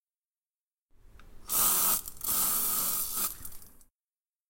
Sound of household chores.
Panska, household, chores, CZ, Czech, Pansk